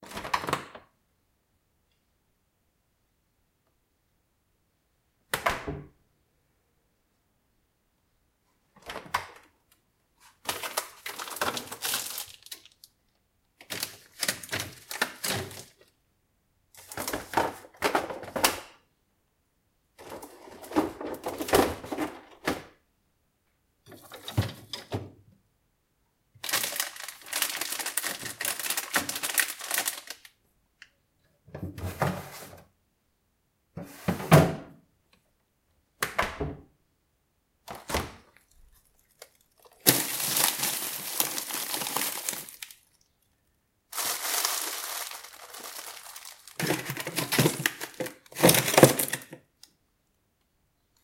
Various sounds with a fridge and a freezer.